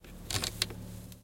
14 Volume up knob
Turning up volume knob
knob, car, Panska, Czech, volume, up